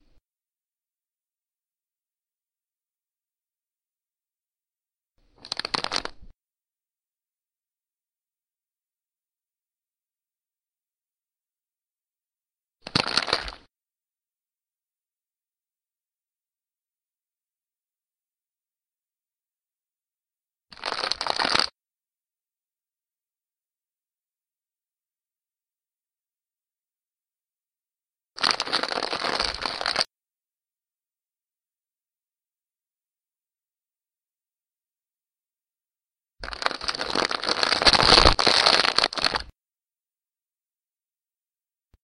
close mic, crackling wrapping paper
bone, horror, crackling